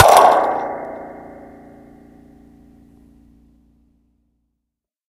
Impulse responses made with a cheap spring powered reverb microphone and a cap gun, hand claps, balloon pops, underwater recordings, soda cans, and various other sources.
convolution, impulse, response, reverb, spring